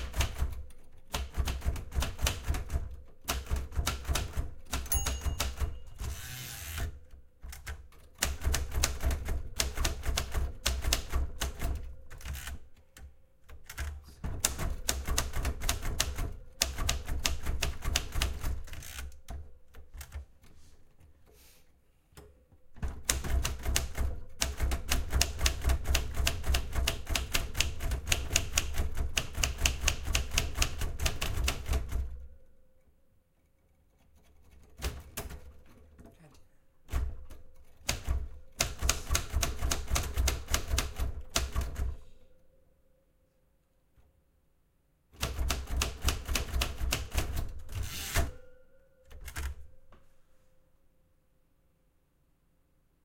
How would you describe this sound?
Recorded myself typing on an old typewriter using a Tascam DR07
old, typewriter, typing